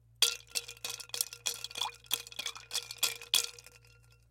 Jab Ice in Glass FF381
Jabbing ice and liquid in container, ice clanking against container